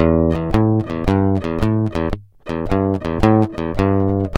Nylon pick, nylon strings, silent acoustic guitar from yamaha, slight muting, 110bpm, no processing.
Yamaha SLG130NW -> PlanetWaves cable -> focusrite saffire pro 14 (Instr input) -> Logic Pro X.
acoustic, classical, clean, nylon, unprocessed